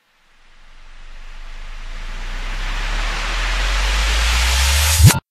awesome sound
a soud made by reversing techno drop by thecluegeek
electric, sound, weird